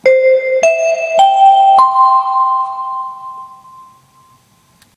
Tannoy chime 04
An imitation of a chime you might hear before an announcement is made.
announcement, melody